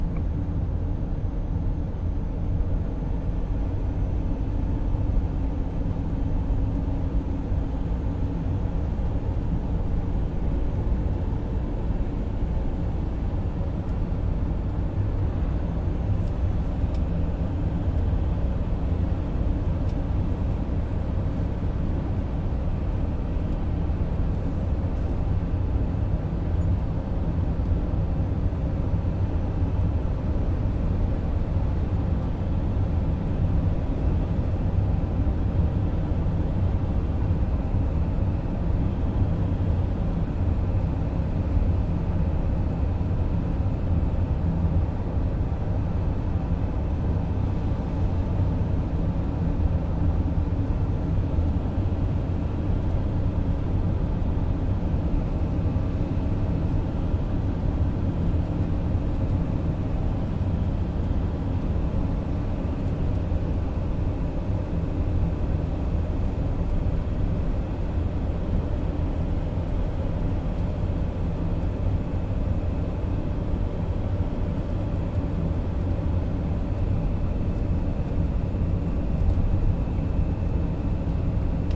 Inside a Citroen Jumper
Recordin at work. Citroen Jumper
Motorway, Free, Ride, Highway, Road, Inside, Transport, Car, Driving, Jumper, Citroen, Street, Vehicle, Interior